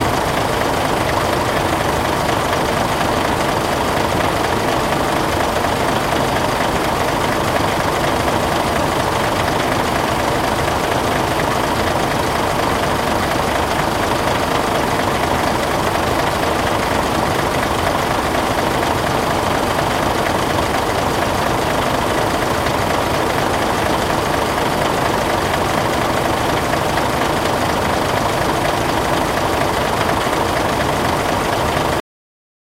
Truck engine running front
A running truck engine recorded from upfront, using a Zoom H2n with the microphones set for MS-recording. MS-decoding is done manually.